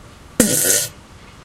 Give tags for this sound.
space; fart; flatulence; car; laser; poot; aliens; beat; snore; noise; frogs; flatulation; race; gas; nascar; computer; frog; explosion; ship; weird

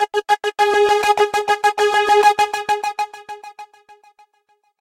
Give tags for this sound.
100bpm; loop; multisample; rhytmic; sequence

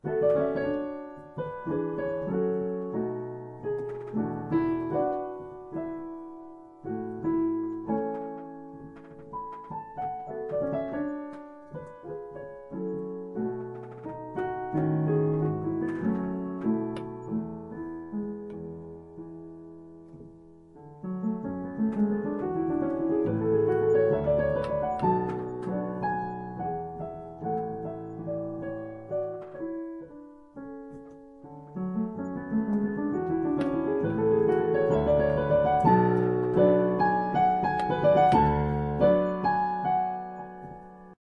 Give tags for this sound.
piano; music; classical

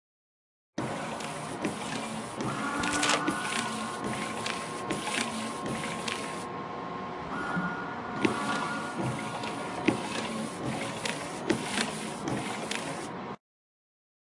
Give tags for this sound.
car; WINDOW; WIPERS; REAR; electric

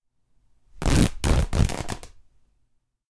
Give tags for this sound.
rupturing; ripping; tearing; fabric; drapery; bursting; breaking

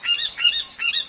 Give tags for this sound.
bird; call; donana; duck; field-recording; funny; marshes; nature; ringtone; south-spain; spring

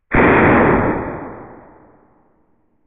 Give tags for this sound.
soundeffect; effect; field-recording

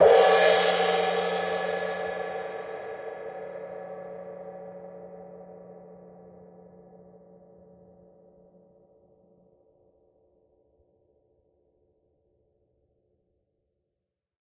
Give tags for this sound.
clean; crash; cymbal; cymbals; drums; dry; percussion; quality; splash; zildjian

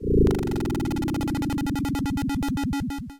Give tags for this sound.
8-bit; arcade; chip; chippy; decimated; game; games; lo-fi; noise; retro; video; video-game

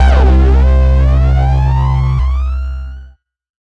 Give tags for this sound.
110; 808; 909; acid; bass; bounce; bpm; club; dance; dub-step; effect; electro; electronic; glitch; glitch-hop; hardcore; house; noise; porn-core; processed; rave; resonance; sound; sub; synth; synthesizer; techno; trance

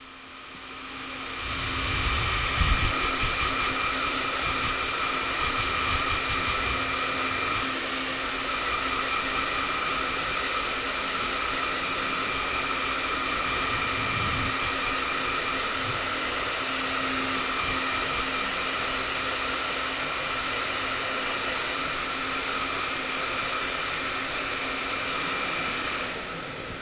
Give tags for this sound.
Barcelona; centro; deportivo; dryer; filipino-community; gimnasio; guinard

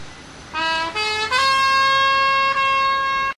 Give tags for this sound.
road-trip; vacation; travel; summer; field-recording; washington-dc

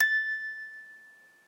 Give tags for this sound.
single-note; pitch-a6; Musical-Box

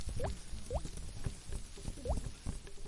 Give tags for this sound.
ambient; field-recording; foliage; nature; rain; repeat

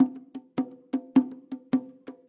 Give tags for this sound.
drum; percussion; bongo; loop

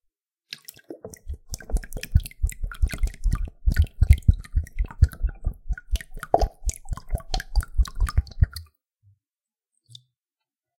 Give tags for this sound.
wet; fill; bottle; drip; submerge

